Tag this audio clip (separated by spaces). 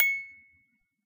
note metal sample toy musicbox clean